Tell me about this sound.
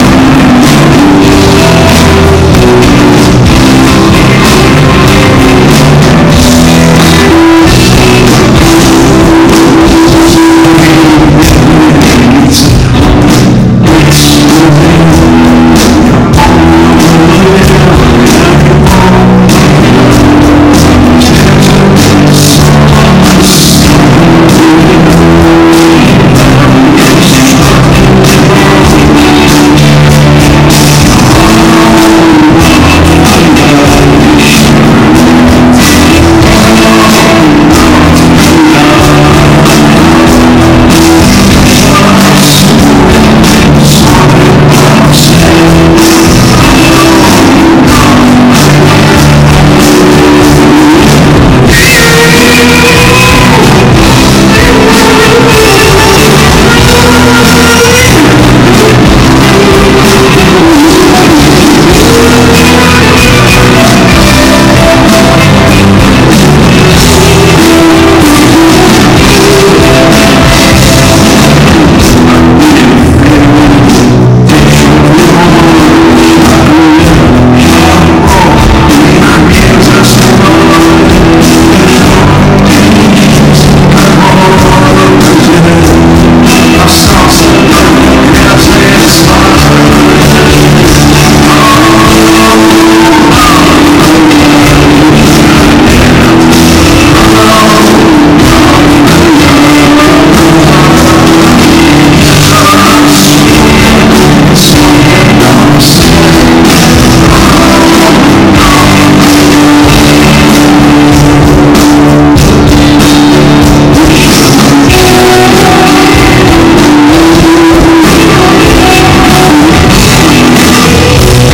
BGrebenshikov concert2
Boris Grebenshikov concert a bit record. Strong distortions on loud sounds.
Recorded with Galaxy Nexus by my friend Denis Nelubin.
Recorded: 23-03-2014, Omsk.
Galaxy-Nexus, Grebenshikov